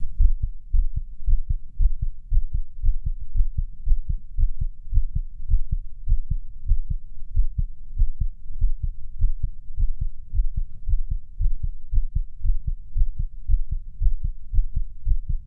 heartbeat (fast but inconsistent)
Yes, it's your boy's heartbeat. Recorded all with the H5. Sounds amazing doesn't it? I'm so happy with my purchase.